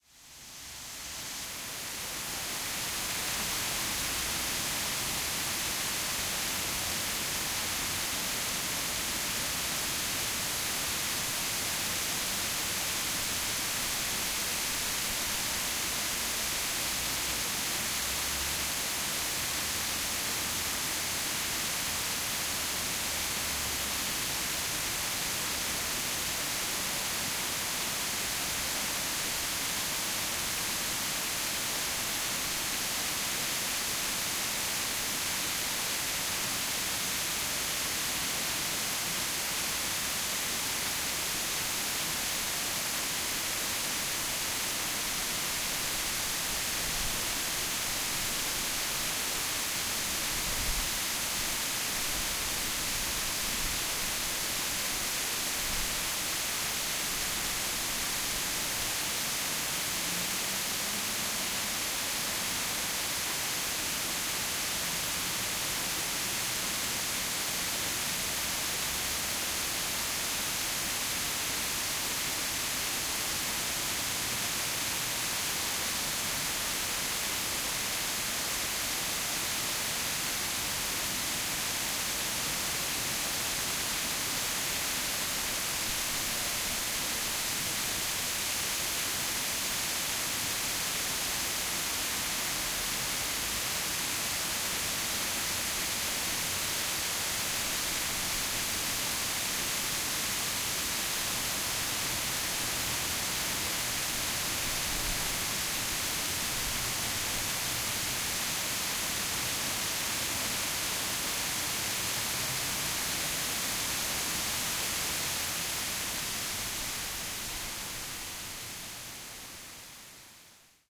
park waterfall tokyo2
A recording of a big waterfall at Sugiyama Park in Nakano, Tokyo.
background, city-park, cityscape, fountain, japan, park, waterfall